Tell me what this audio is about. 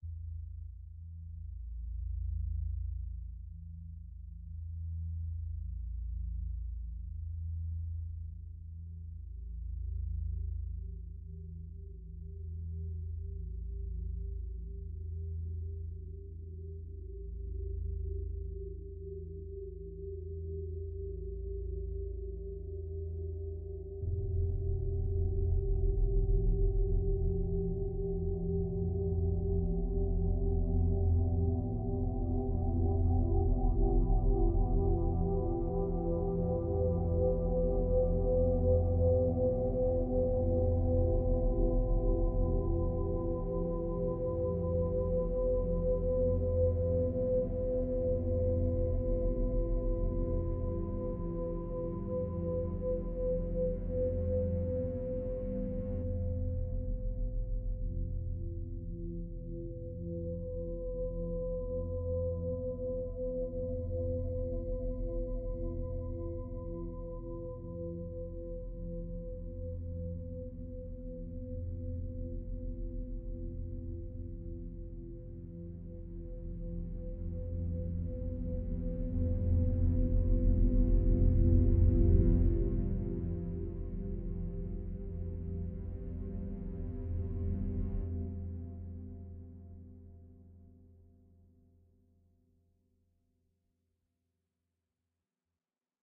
Sci-Fi-SuspensePad
A weird synthesis of sounds made out of real voices.
Enjoy.
Ambient, Atmosphere, Cinematic, Creepy, Dark, Deep, Drone, FX, Film, Horror, Movie, Pad, Rumble, SFX, Scary, Spooky, Suspense